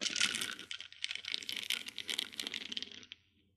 A half-empty pill bottle hitting the floor and slowly rolling.
Recorded with Shure SM57.